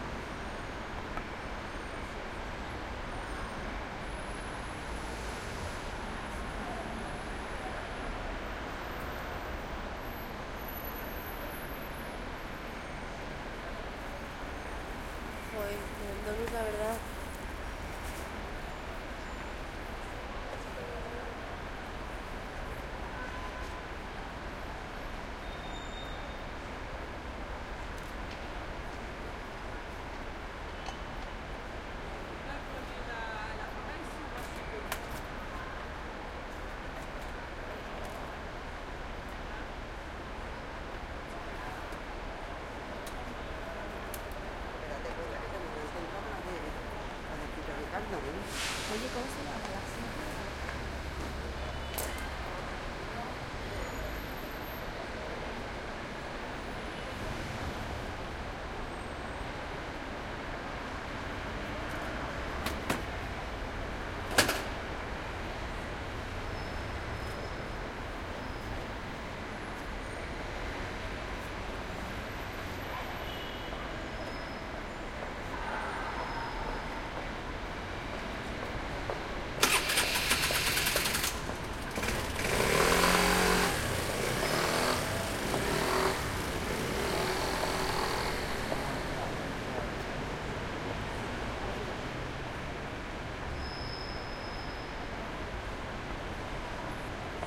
barcelona night street city
This recording is done with the roalnd R-26 on a trip to barcelona chirstmas 2013.
street, city, field-recording, motorcycle, traffic, night, barcelona, urban, cars